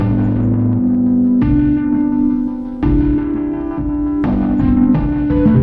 Piano Snippet 4
Ambiance, Ambience, Ambient, atmosphere, Cinematic, commercial, Drums, Loop, Looping, Piano, Sound-Design